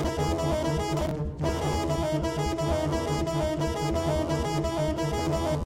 sax realtime edited with max/msp
up-and-down-a-note, edited, sax, loop